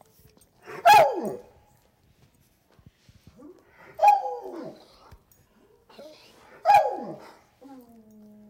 Igor-3 yips
A recording of my Alaskan Malamute, Igor, while he is waiting for his dinner. Malamutes are known for their evocative vocal ability. Recorded with a Zoom H2 in my kitchen.
bark, dog, growl, howl, husky, malamute, moan, sled-dog, wolf